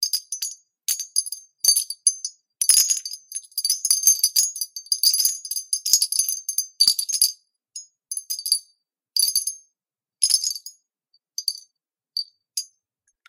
Wanted to make a beautiful newage synth pad with spacious windchimes.
Recorded with a Sony IC recorder, cleaned up using Edison in Fl Studio.
windchime, sony-ic-recorder